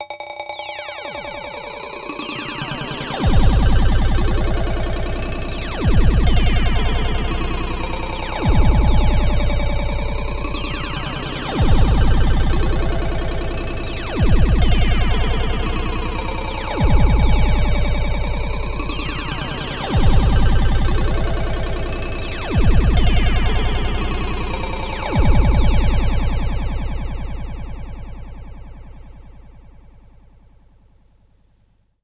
fx made on the korg DM with no processing afterwards